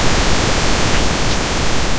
Water drops inverted
Took a photo of some water drops on the kitchen work surface with my iphone.
Since the work surface is white, I tried inverting the colours to get a black background on the image (which works better for converting an image to sound using AudioPaint).
Unfortunateyl that did not work very well and I ened up with a grey background.
Converted to sound using Audiopaint, standard parameters.
AudioPaint, image2snd, image-to-sound, Nicolas-Fournel, photo, sound-from-photo